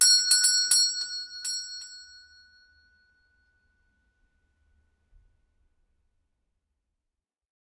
Doorbell Pull without pull Store Bell 01
Old fashioned doorbell pulled with lever, recorded in old house from 1890
Pull, Store, Doorbell